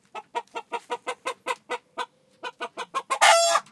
hen clucking. PCM M10 internal mics, recorded near Utiaca, Gran Canaria